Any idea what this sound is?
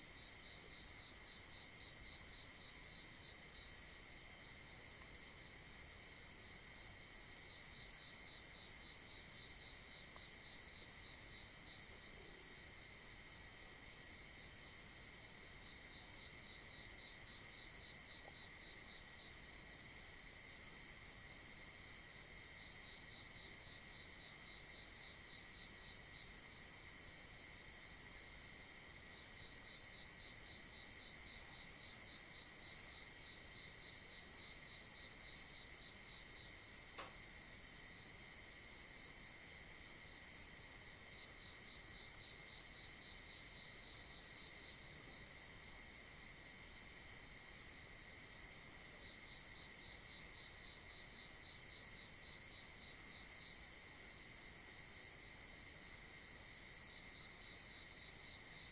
BR 027 VN naturesounds
Night ambience in the mountains and countryside in the north of Vietnam
You can hear crickets and sects.
Recorded in September 2008 with a Boss Micro BR.
nature, countryside, Mountains, ambience, night, field-recording, Vietnam, insects, crickets